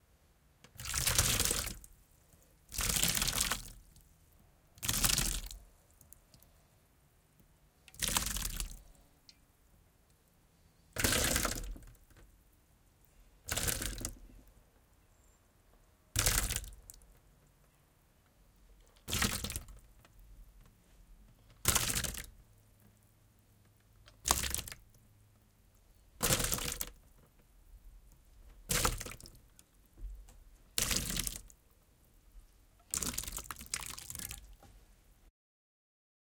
This sound was recorded for use in a video game mod. It was used as part of vomiting sound effect. Pretty gross. I heated up a bunch of vegetable soup to get it nice and slushy, and poured it back and forth between metal pans. This was a fun one to record, though my back porch got pretty messy. I did this with a couple of Kam i2's into a Zoom H4N (though I think it sounds better in with just one side in mono).
Hear the sound in-game here: